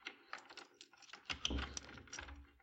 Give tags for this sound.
unlocking; door; unloc

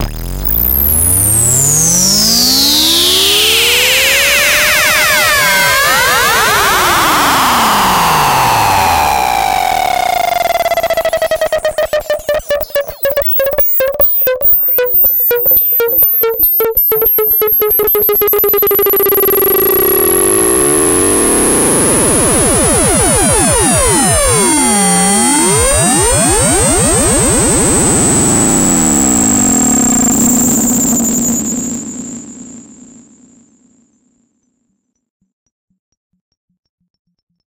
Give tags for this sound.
DJ; FX; Records; Sound

